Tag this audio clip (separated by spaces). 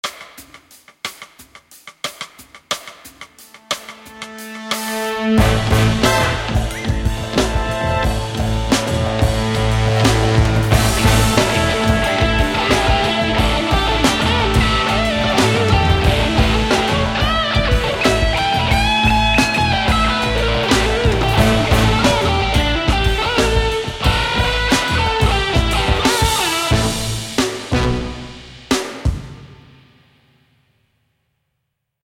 solo bigband orchestra guitar